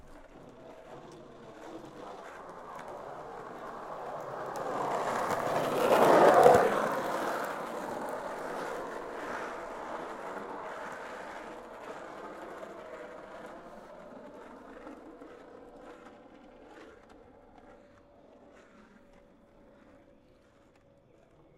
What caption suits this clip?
Skate pass on road 3
Long board stake, hard wheels. Recorded with a Rode NT4 on a SoundDevices 702
long-board, pass, skate, road, asphalt